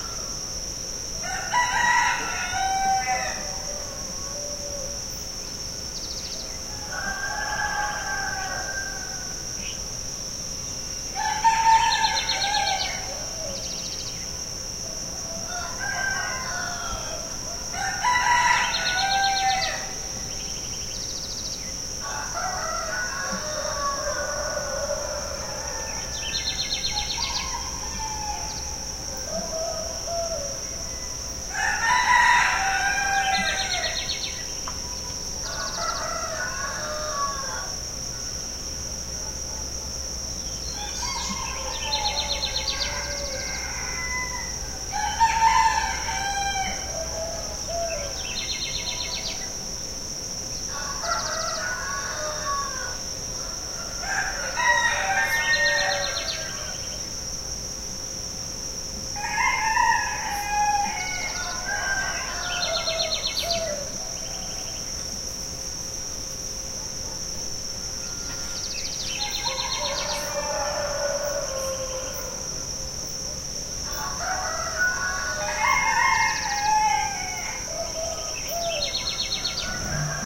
Amanhecer no bairro Curiachito.
Data: 02/jun/2016
Horário: 04:00
Gravado com gravador de mão Sony PCM-D50.
Som captado por: Maria Clara Arbex.
Este som faz parte do Mapa Sonoro de Cachoeira
Dawn in Curiachito neighborhood.
Date: Jun/02/2016
Time: 04:00 A.M.
Recorded with handy recorder Sony PCM-D50.
Sound recorded by: Maria Clara Arbex.
This sound is part of the Sound Map of Cachoeira City
amanhecer, ambience, birds, cicada, cigarra, curiachito, curiaxito, dawn, field-recording, galos, nature, natureza, p, roosters, ssaros, varanda